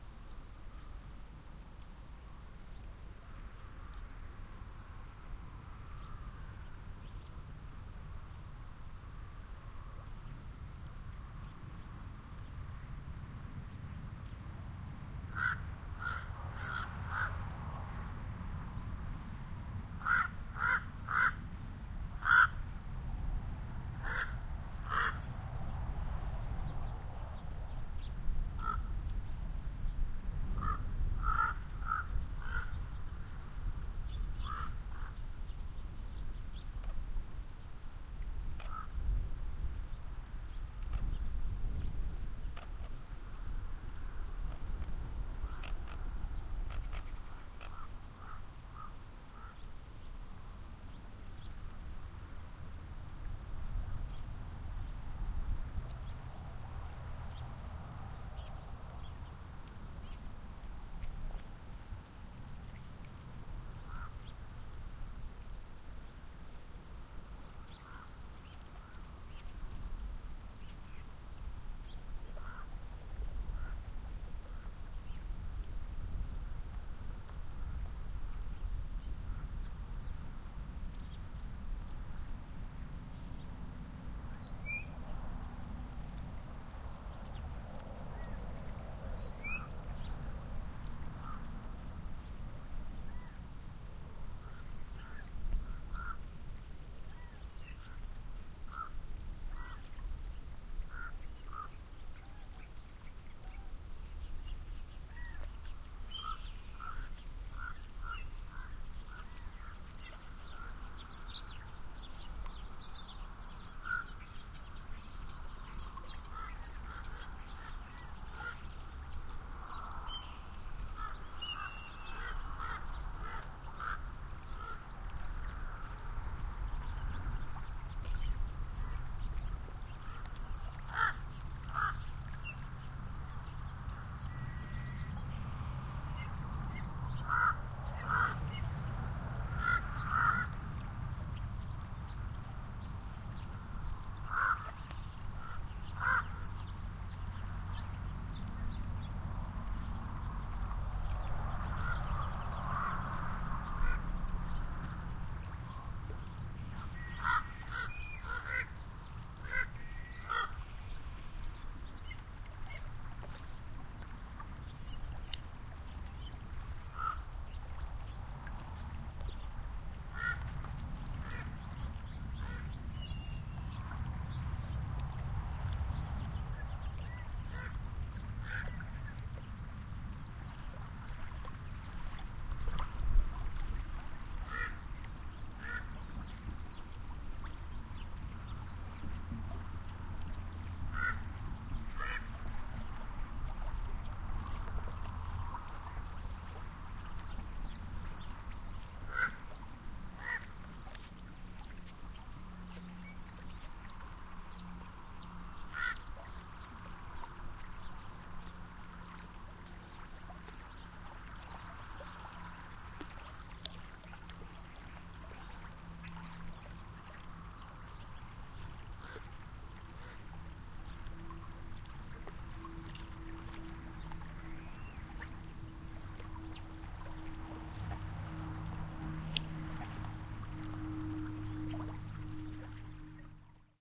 An overcast December evening at Elizabeth Lake, around 4:30 PM. A steady wind creates wavelets on the lake; birds sing in the cottonwoods, and ravens fly overhead. Amplified in Audacity. Due to the amplification, a recording hiss is audible that I could not edit out without sacrificing some sound quality. I'll keep trying.